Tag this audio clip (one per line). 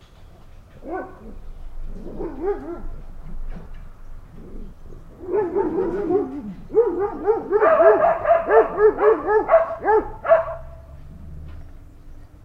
dogs
field-recording
nature